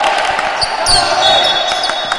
una mica de tot
basket, field-recording, todo